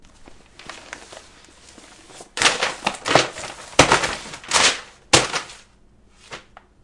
Papers falling entire files faling from a table. news papers falling on the floor